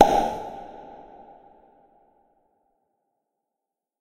forehand close
Synthetic tennis ball hit, forehand, performed by the player.